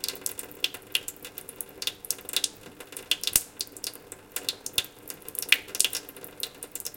Just some water trickling on some metal. Recorded with a Zoom Q4 Mic.